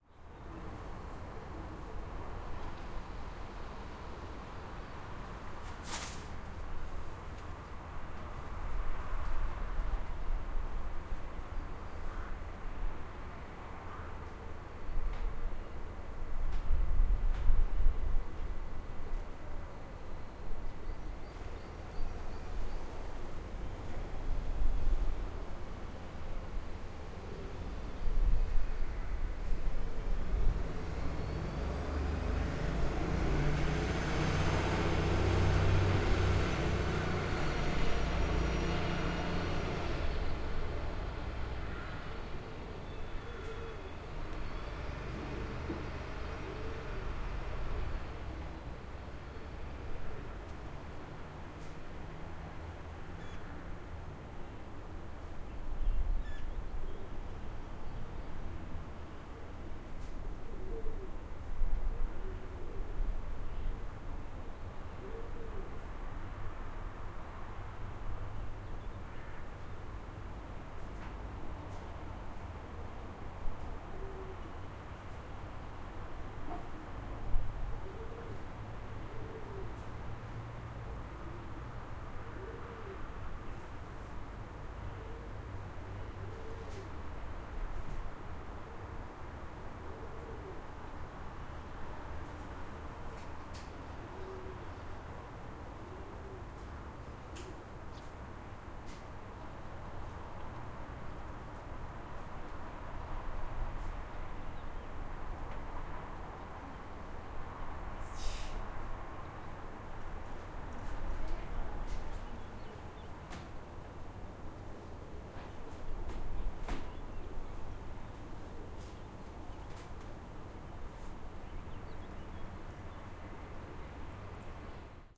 Ambience - morning - window - city - calm - pigeon
Morning ambience at a city window with occasionnal pigeons.
ambiance, ambience, birds, calm, city, field-recording, morning, pigeon, town, window